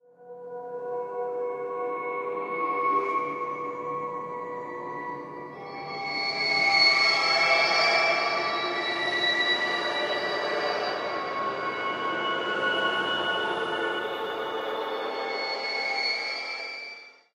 Atmospheric Seq 1
A mysterious sequence good for Sci-Fi movies. Sample generated via computer synthesis.